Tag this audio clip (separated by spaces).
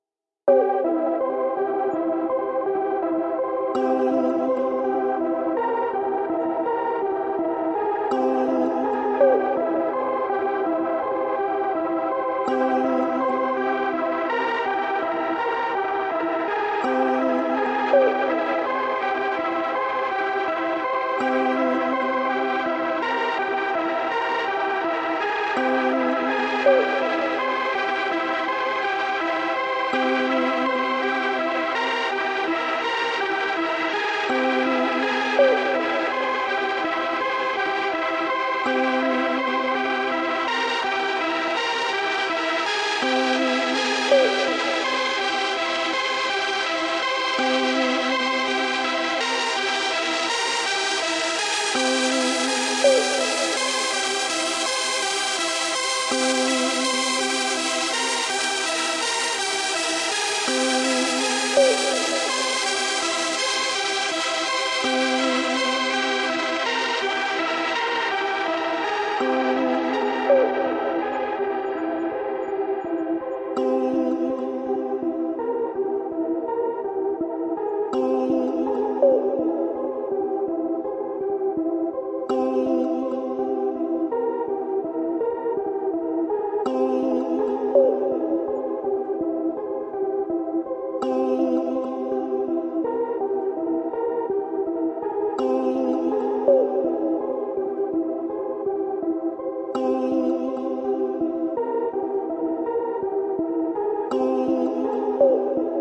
ambient club dance effect electronic house leads loopmusic rave sound trance vocal vox